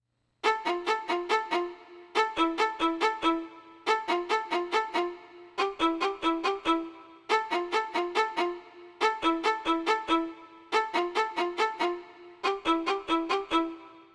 A Violin Motif from a recent track; at 140BPM